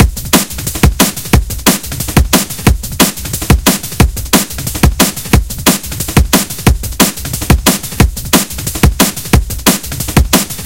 Cargo Break (180 BPM)
4x4-Records, Bass, Clap, Closed, Dance, Drum, Drums, EDM, Electric, Hi-Hats, House, J-Lee, Kick, Loop, Music, Off-Shot-Records, Sample, Snare, Stab, Synthesizer